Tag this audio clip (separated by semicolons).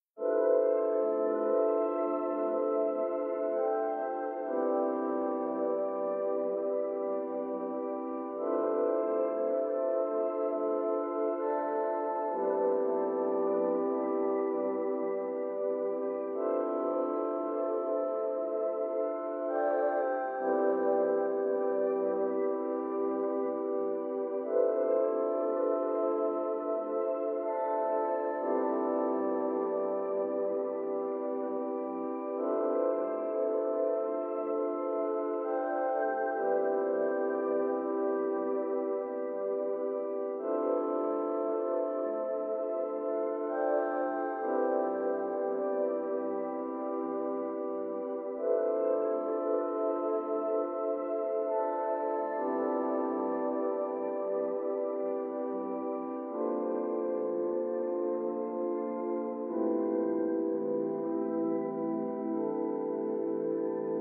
artificial; chords; multisample; music; soundscape; space